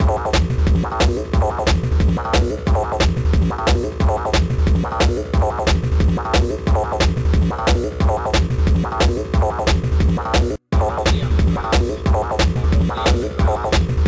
electro wave
dance, electronic, electronica, mix, techno